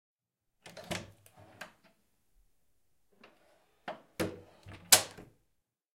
Front Door Open Close Interior
Recorded with a Zoom H4N in a Small House. An Interior Recording of a Front Door Opening and Closing. Stereo Recording
gentle, door, open, interior, stereo, close, front